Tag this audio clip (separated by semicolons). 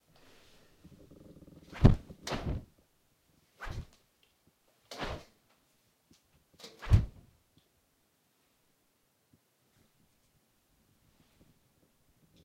fly; swat; swatter; swing; swish; swoosh; whip; whoosh; wind; woosh